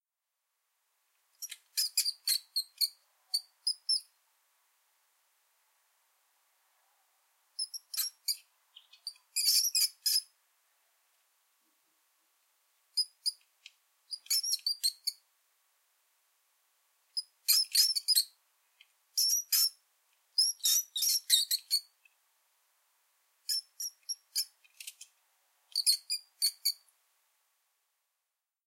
One morning I woke up and found I had a mouse/rat trapped, but still alive. It was making squeaking sounds, so I recorded them, edited out the noise as much as I knew how to, and amplified the whole thing. The mouse was disposed of shortly afterward.